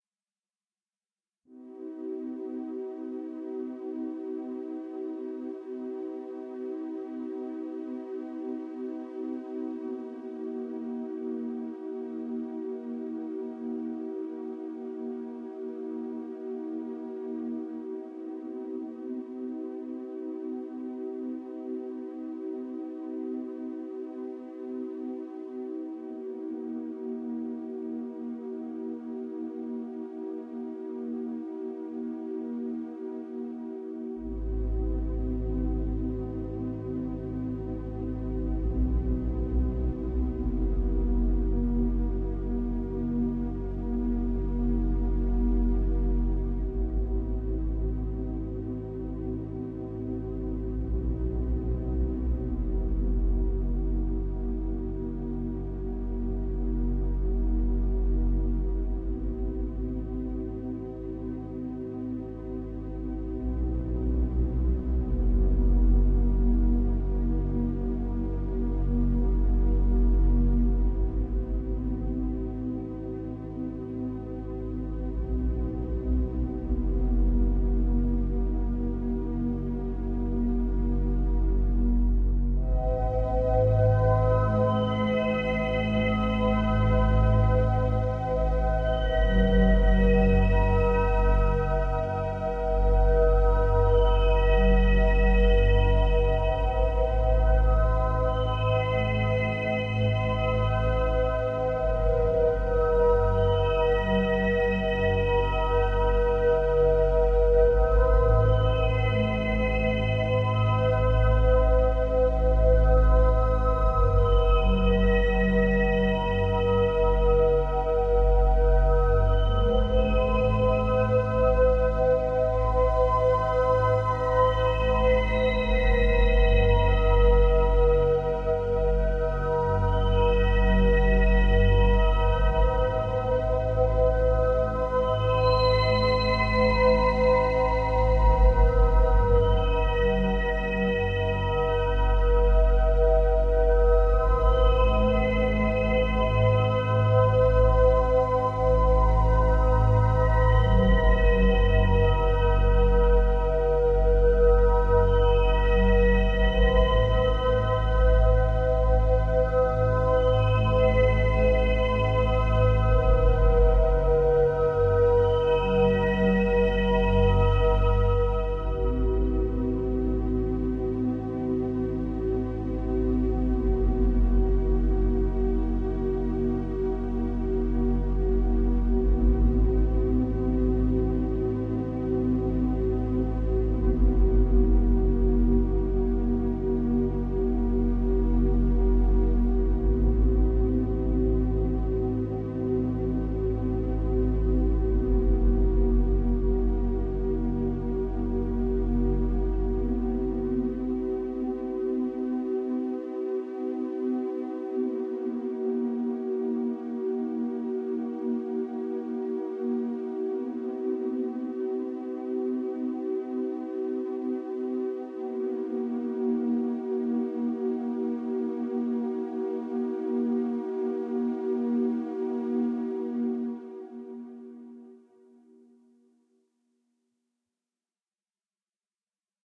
Calm synth music
ambience, atmosphere, Calm, cinematic, electro, electronic, movie, music, sci-fi, synth